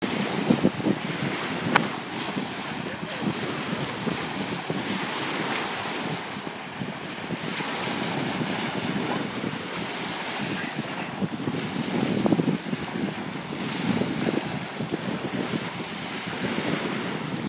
MySounds gwaetoy waves
Recordings made on a sound walk near Lake Geneva
nature, TCR